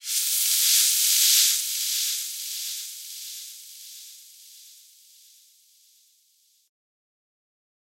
Noisy "tsch" sound run through delay.